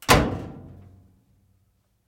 Appliance-Clothes Dryer-Door-Close-02
The sound a clothes being shut.
This file has been normalized and background noise removed. No other processing has been done.
Appliance, Close, Clothes-Dryer, Door, Dryer, Metal